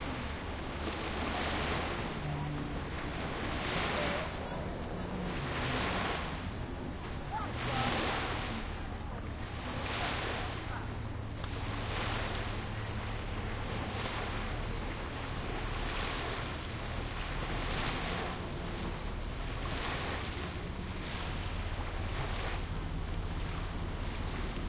Water wave Beach Peoples ships Field-recording 200815 0037
Water wave Beach Peoples ships Field-recording
Recorded Tascam DR-05X
Edited: Adobe + FXs + Mastered
shore, field-recording, ships, beach, coast, seaside, vast, speak, Peoples, water, people, ocean, waves, sea, Peaceful, nature, wave